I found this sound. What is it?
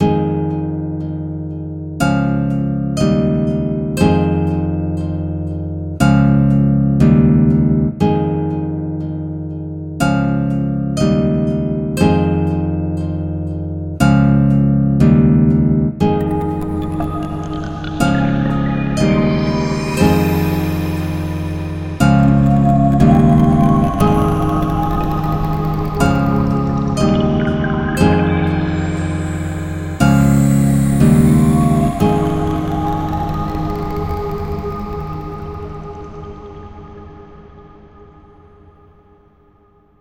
a gentle loopable acoustic guitar riff. Guitar only or with 4 bit pad or lsd waves for your enjoyment! As always you can use the whole riff or any part of it to do with as you please.
synth, lsd, gentle, soothing, pad, loopable, guitar, acoustic, riff, loop